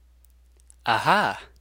AhHa Erica
Ah ha sound, use it for anything you want I don't care if you trace credit for the sound back to me.
Ah-Ha, Erica, Ive-done-it, Finally